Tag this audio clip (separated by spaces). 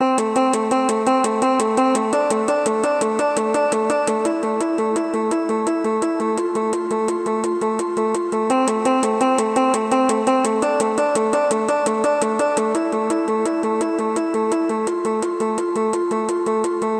loop string